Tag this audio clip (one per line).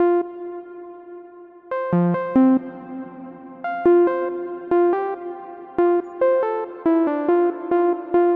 140
bpm
club
dance
electro
electronic
house
loop
music
rave
synth
techno
trance